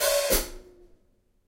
open then closed hat 3
Individual percussive hits recorded live from my Tama Drum Kit